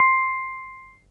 Preset from the Casio Casiotone 1000P (1981), C Note, direct recording converted to stereo